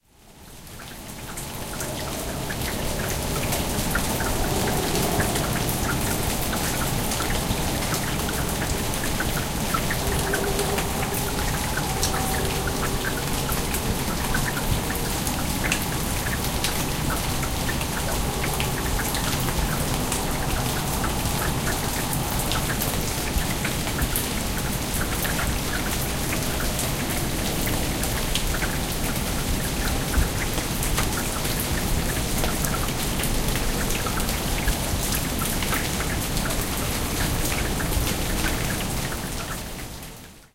Raindrops after the rain with a noisy highway in the distance.